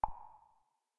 A click-like synth sound that could be "select" in a menu.
game, computer, digital, select